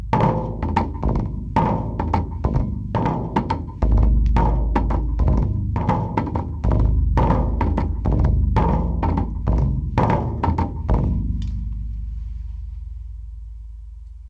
beat variations, three drums, own designs
prototypes, drum, experimental, music